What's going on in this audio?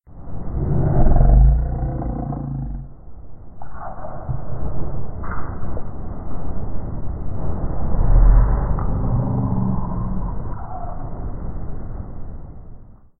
BEAULIEU Sarah 2015 2016 yhtem
What if we could hear sounds from another planet ?
In this imaginary place, people can visit zoos under water. Here is the sound of a Yhtem, recorded by a visitor from inside his personal submarine. The recording device was found by a member of the zoo staff, as it was floating over the water. It gave no more informations about what happened to the visitor, except that according to several monster's specialists, the Yhtem « sounded hungry ».
In the real world, this sound was created using the sound of a very brief scream. At the background was a noisy street, and some cars were passing by.
I used two different screams and mixed them, before decreasing speed and tempo in Audacity.
X
Son « cannelé ».
Le son « d'arrière plan » est étouffé, plutôt doux, mais il y a des « accents » rugueux, acides, lors du grognement.
L'attaque est graduelle. On distingue quelques notes, différentes hauteurs. Variation scalaire.
water, creepy, roar, planet, fantasy, horror